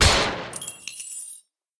CG Full Single
Original Gun sound Design using metal gates, wooden blocks, and locks.
firing, gun, military, shooting, shot, weapon